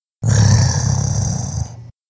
A growl I made using an app on my phone, my throat, and a few tweaks in Audacity to clean the sound up. Enjoy!
awesome, bad, dark, dismal, freaky, growl, horror, macabre, mean, monster, odd, scary, scary-animal